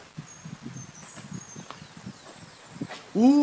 Some birds i recorded at Barcelona. iPad microphone